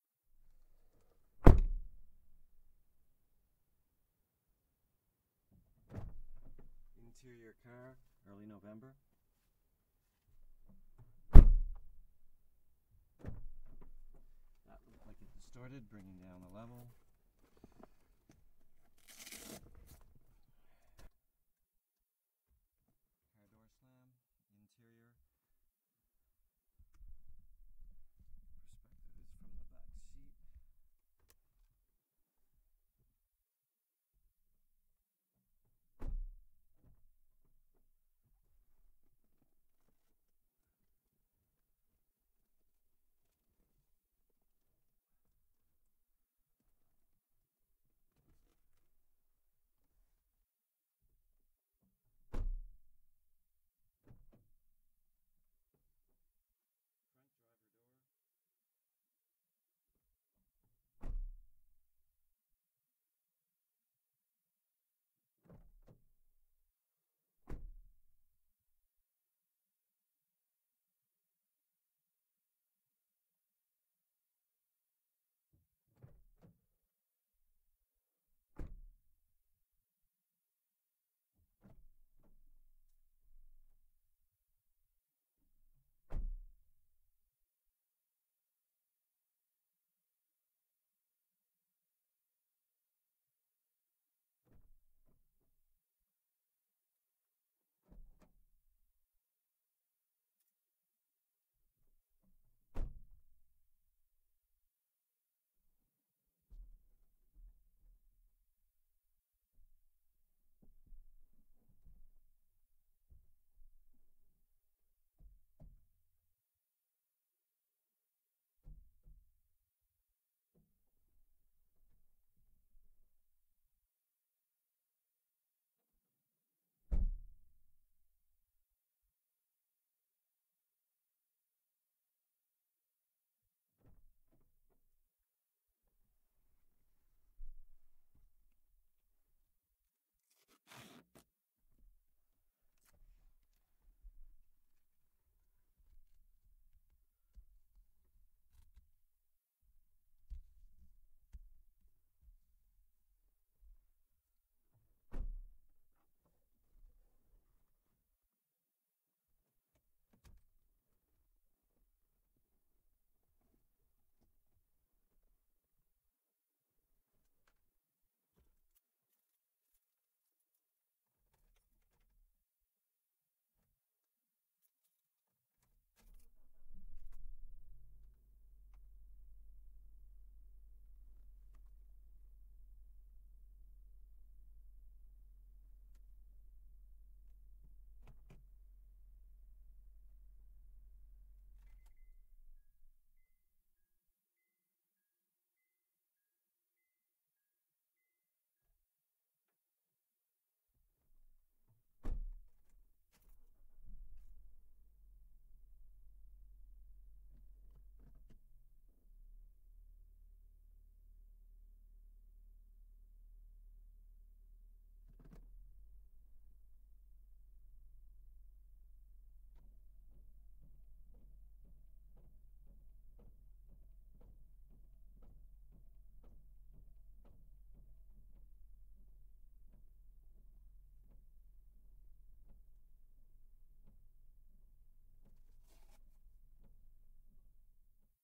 Please note to engage END FIRE in decode (the mic was pointed for on Z axis and not compensated for during record). Interior backseat POV. honda civic 2006. alternating opening and shutting doors and windows.